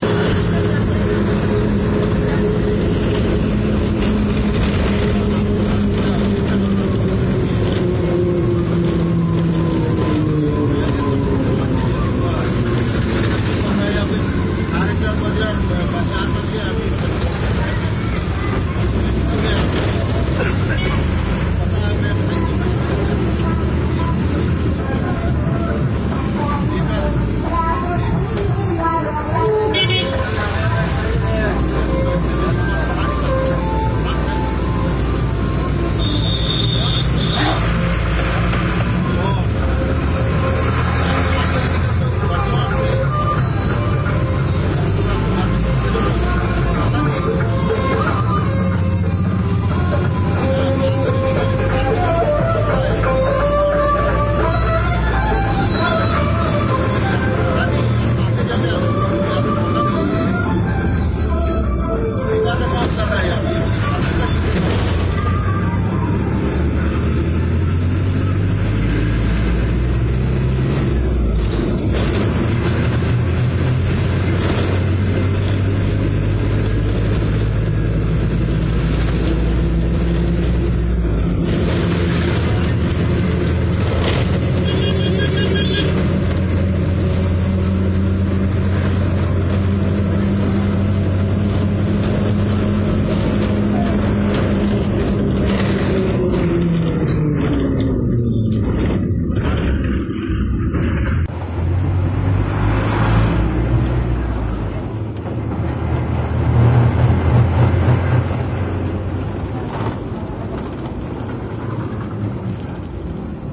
recorded inside an Indian Bus.